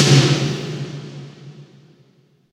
Power rock snare processed with cool edit 96 reverb.
drum, free, sample, snare